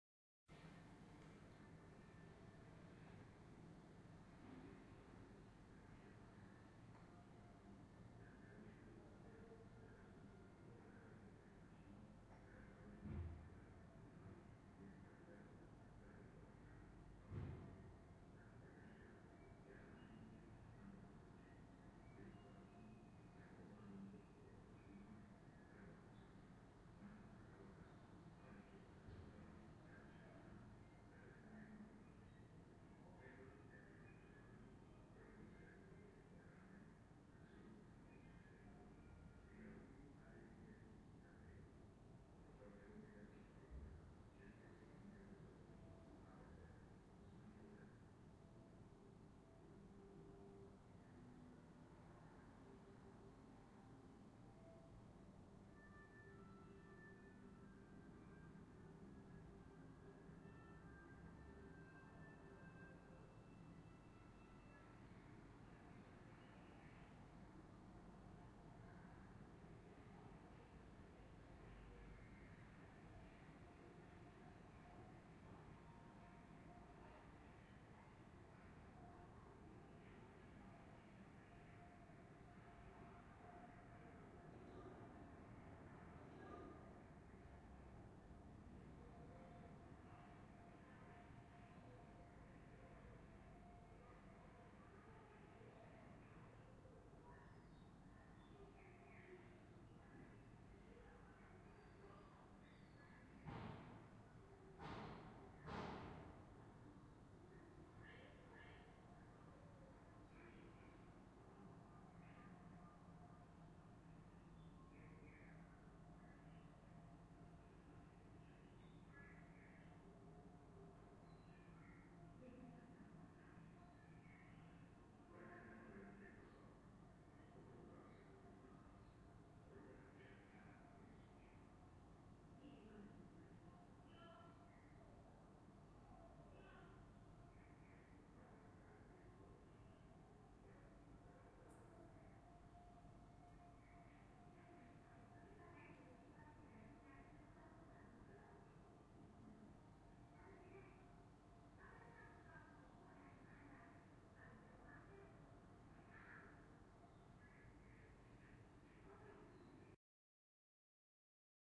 hall in concrete prefab house, television sound coming from closed apartments
// ZOOM H2N //